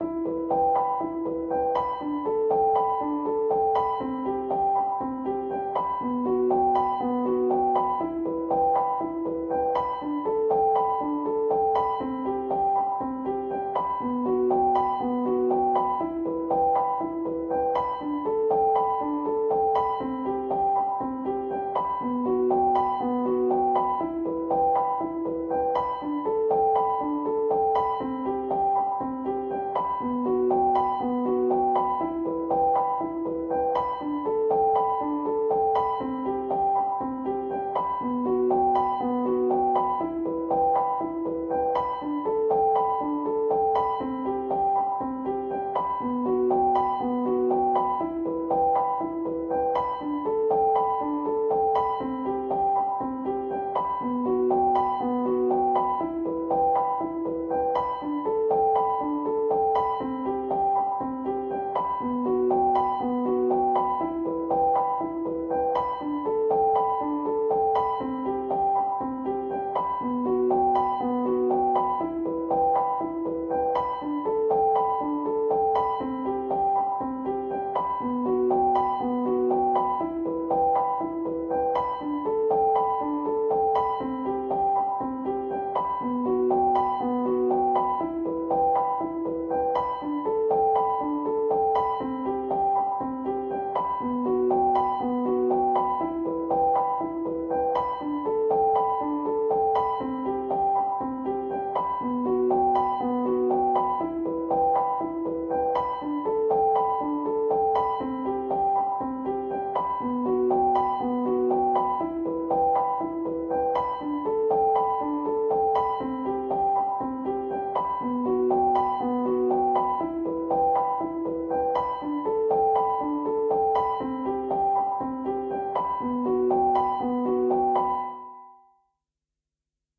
Piano loops 050 octave up long loop 120 bpm
free, bpm, simplesamples, simple, reverb, Piano, music, 120, 120bpm, loop, samples